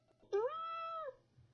A 'meow' sound from my kitty Luna. Recorded with my microphone.